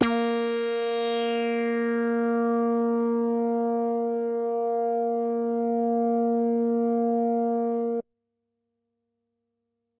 DDRM preset #14 - Bb3 (58) - vel 127
Single note sampled from a Deckard's Dream DIY analogue synthesizer that I built myself. Deckard's Dream (DDRM) is an 8-voice analogue synthesizer designed by Black Corporation and inspired in the classic Yamaha CS-80. The DDRM (and CS-80) is all about live performance and expressiveness via aftertouch and modulations. Therefore, sampling the notes like I did here does not make much sense and by no means makes justice to the real thing. Nevertheless, I thought it could still be useful and would be nice to share.
Synthesizer: Deckard's Dream (DDRM)
Factory preset #: 14
Note: Bb3
Midi note: 58
Midi velocity: 127